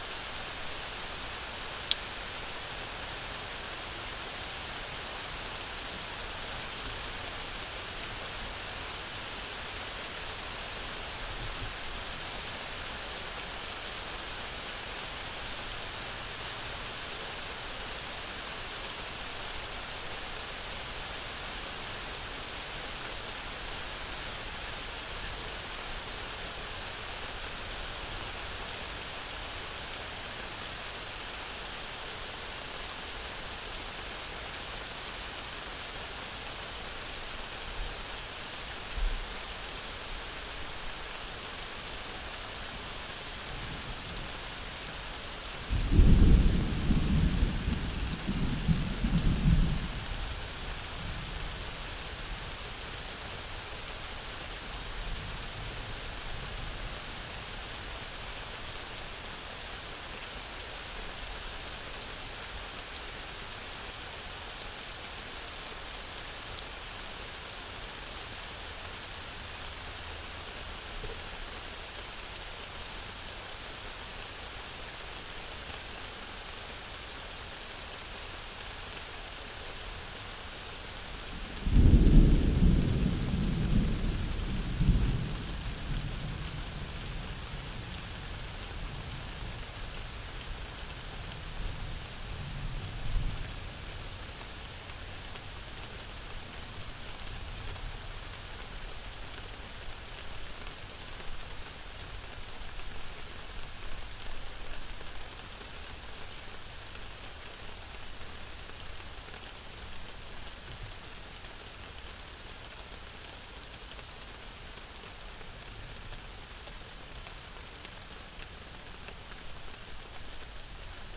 Decided to try recording a rainstorm passing by in Florida and ended up pretty good. comment a link to what you used it in but you don't have to :)